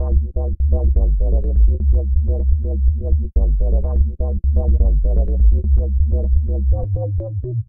zulu 125 C wobble Bassline
Roots rasta reggae